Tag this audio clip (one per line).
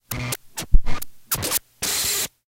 music
mechanical
noise
tape